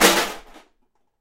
aluminum cans rattled in a metal pot
aluminum, cans